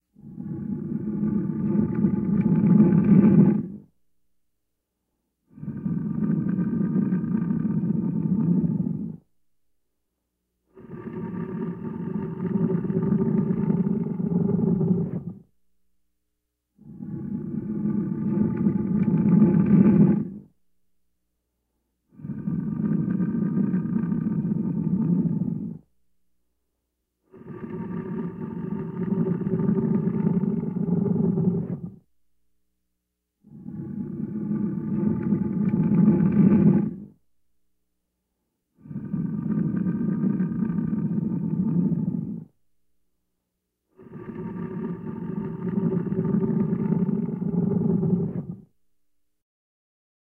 Deep growling rumble. Sounds like a monster or a lion. Made with a block scraping along a cement floor, pitch shift and reverb added.